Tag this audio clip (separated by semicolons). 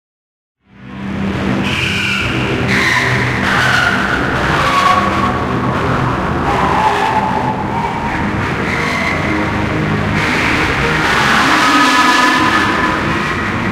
ambient; background; d; dark; dee-m; drastic; ey; glitch; harsh; idm; m; noise; pressy; processed; soundscape; virtual